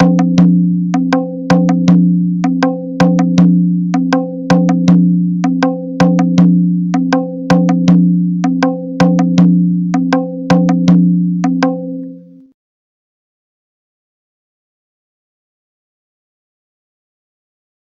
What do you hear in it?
A beat solution using maadal - 3 sounds: Dhing, Taang, Naa, played at 80 beats per minute